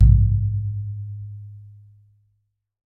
Frame drum oneshot RAW 17
Recording of a simple frame drum I had lying around.
Captured using a Rode NT5 microphone and a Zoom H5 recorder.
Edited in Cubase 6.5
Some of the samples turned out pretty noisy, sorry for that.
deep, drum, drumhit, drum-sample, frame-drum, hit, low, oneshot, perc, percussion, raw, recording, sample, simple, world